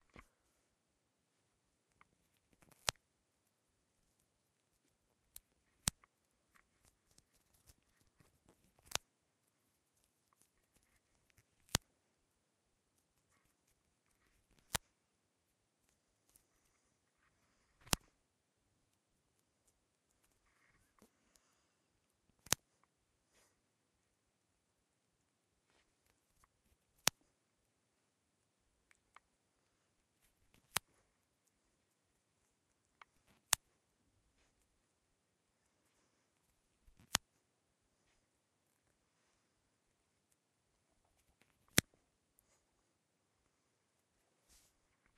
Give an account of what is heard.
Ripping Blu-Tack
The sound of ripping a piece of blu-tack in two, done multiple times.
sfx, effect, breaking, soundeffect, sounddesign, noise, blutack, fx, sound-effect, sound, tearing, foley, ripping, blu-tack, stretching, pulling, natural